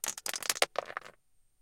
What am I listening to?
One day in the Grand Canyon I found a deep crack in a cliff so I put my binaural mics down in it then dropped some small rocks into the crack. Each one is somewhat different based on the size of the rock and how far down it went.